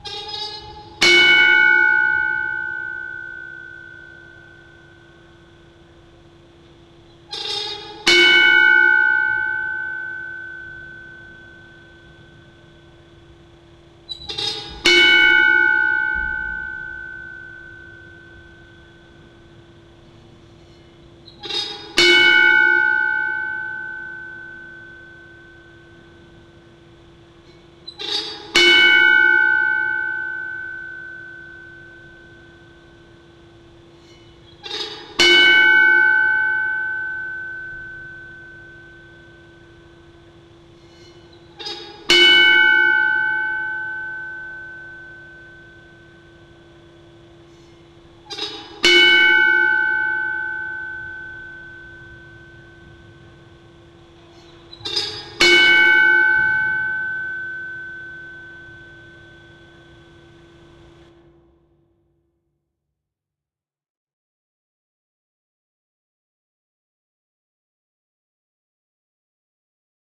Medieval bells of doom
Atmospheric bells that have a medieval church bell sound. This sound was made by playing a Swiss cowbell and then slowing down the sound!
church, spooky, creaking, old, dark, creepy, horror, bell, medieval, doom